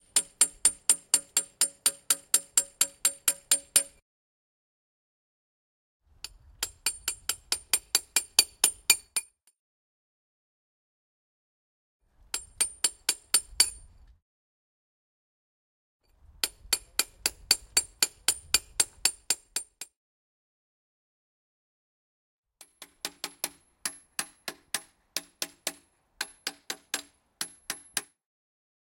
14GNechvatalovaJ-chisel
middle distance, outdoors
close distance outdoors
Recorded on ZOOM H1 recorder
CZ, Czech, differt-anngle-knocking-on-concrete, knocking-on-concrete, Pansk, Panska, three-knocks